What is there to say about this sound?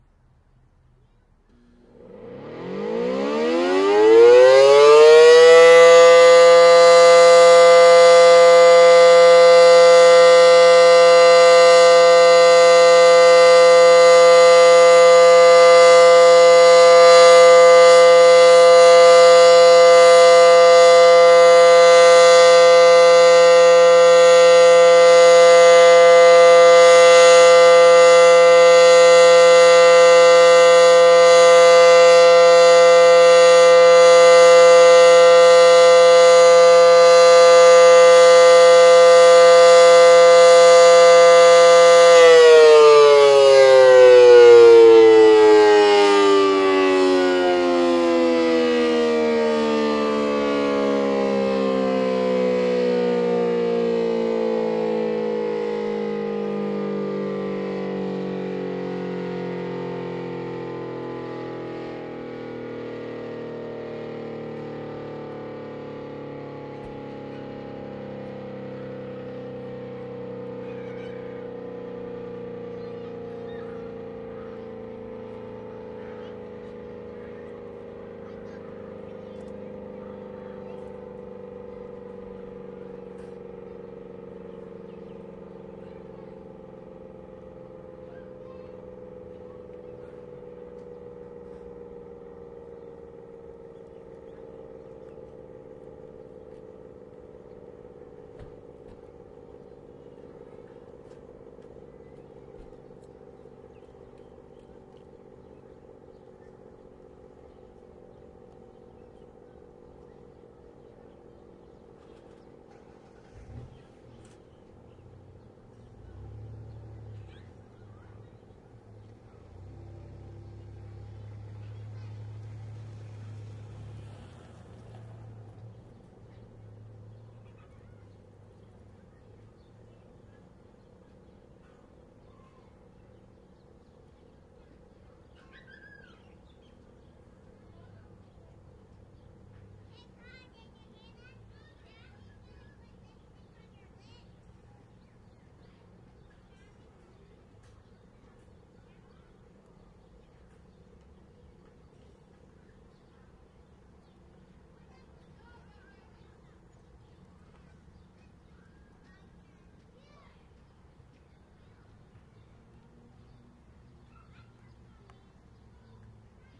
7-1-08 SD-10 Test
7-1-2008 Tuesday. Monthly Hawaii siren 45 second test of the Civil Defense sirens.
This one is a Federal Signal SD-10 (Special Duty - 10 Horsepower 9/12 port ratio). I recorded this about 50 feet away from the siren. It's rated at about 115dB@100ft.
This siren happened to sound during a summer fun session at a local elementary school.
warning, signal, defense, hurricane, siren, raid, disaster, tsunami, civil, sd-10, hawaii, honolulu, air, test, federal